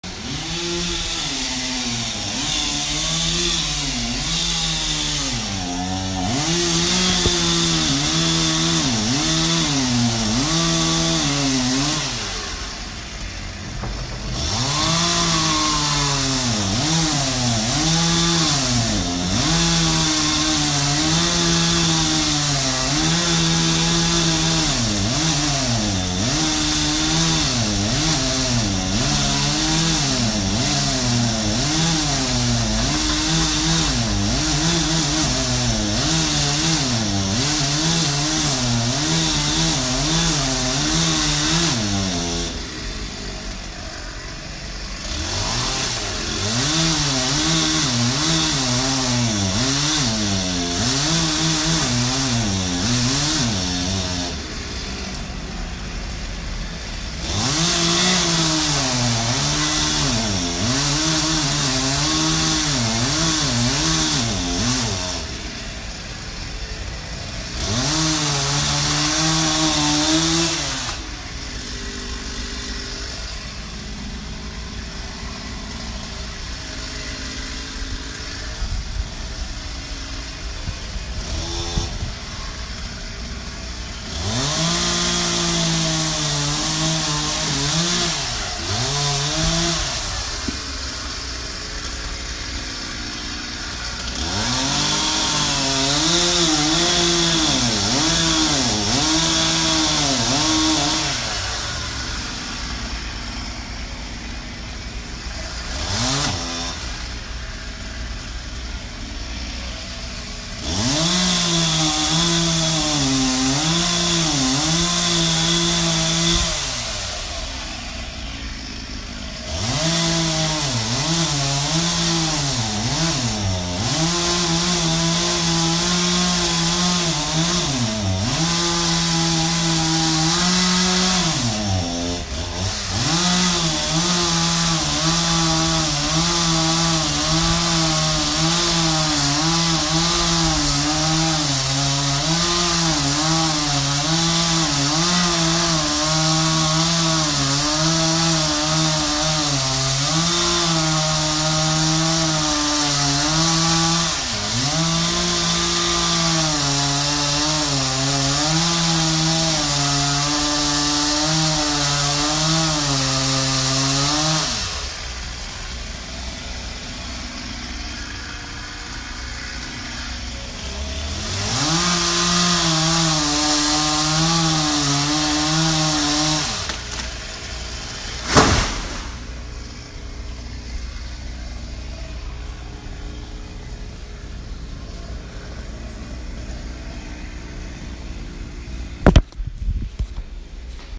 Tree Chainsawed Drops
iPhone 6S mono-recording of a tree being chainsawed, around 3:00 it drops
machinery, industrial, industry, sawing, falls, aggressive, smack, crash, nature, tree, noise, sawed, chainsaw, machine, falling, chainsawed, field-recording, drops